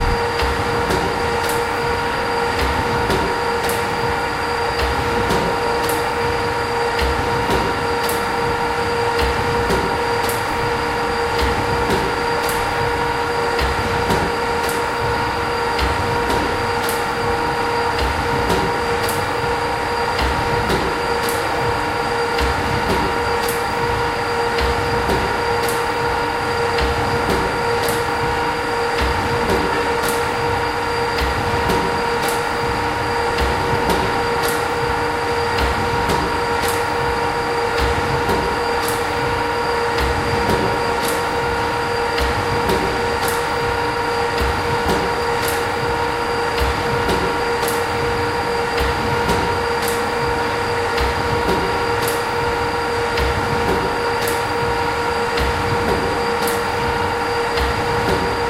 Sound from the Crossley Gas Engine located at the Kelham Island Museum in Sheffield. Recorded on May 27, 2018, with a Zoom H1 Handy Recorder.